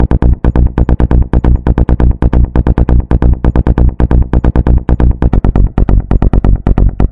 my bass audiosample, 120 to 140 bmp
electronica, bass